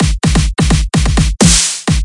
Future Bounce Short Fill
Snare Fill for Future Bounce and More...